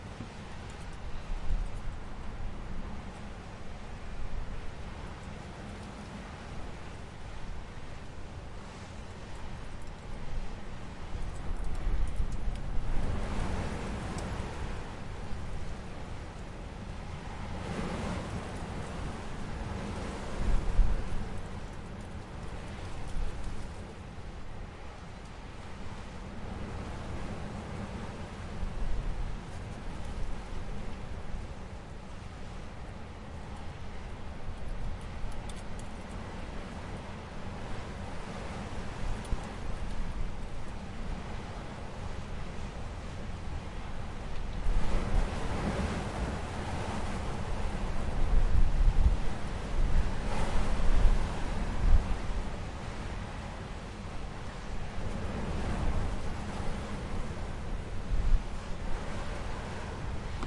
Wind recorded at the end of 2016 in Canary Islands opposite a corner wall. Strong wind (thanks to the mountain), but it was recorded on the other side of a house and it wasn't that strong in that moment.
There are snorts, sorry (I haven't zeppeling).Hope it's useful for you.
Recorded with a Zoom H4n with its internal stereo mic.
Credit is optional: don't worry about it :) completely free sound.
ambient, effect, field-recording, fx, nature, wind